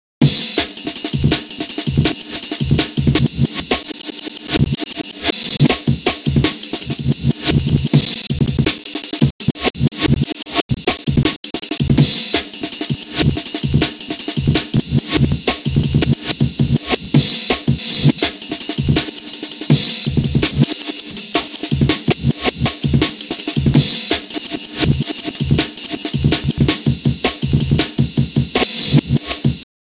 walking backwards.R
random reversals throughout this amen loop....made in pro tools